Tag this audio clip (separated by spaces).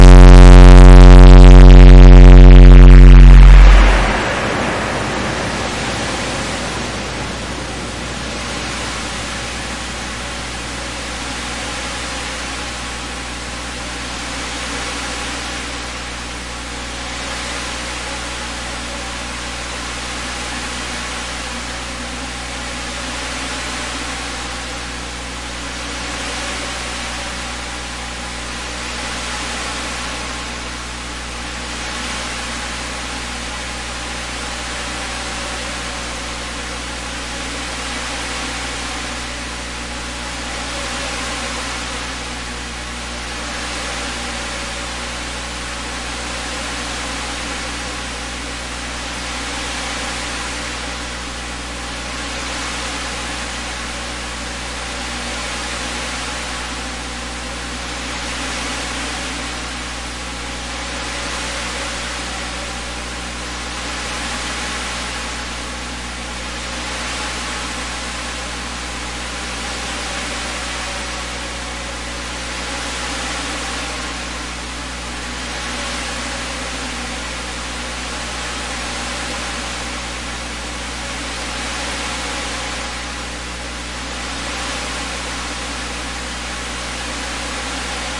asmr ocean waves white-noise beach soothing flanger noise sea nice analog